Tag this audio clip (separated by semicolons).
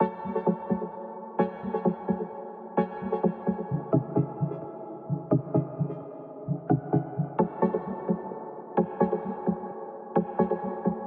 ambience; atmospheric; calm; chillout; chillwave; distance; electronica; euphoric; far; melodic; pad; polyphonic; soft; spacey; warm